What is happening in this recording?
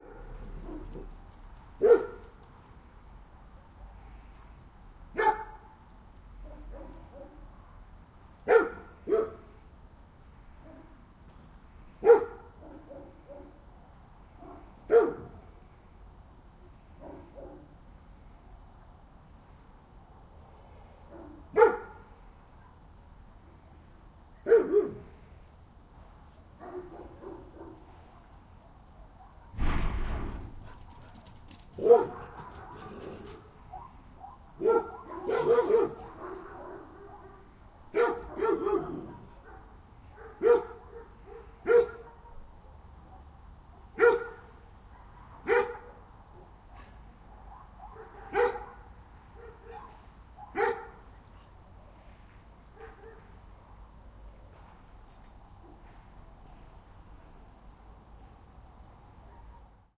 bin dog night
Dog bark in the house garden at night. Recorded with a Soundfield SPS200 microphone and Sonosax SX R4 recorder, converted to binaural with Harpex X with my custom Sofa
ambiance, ambience, field-recording, night, garden, winter, Dog, bark, Animal, house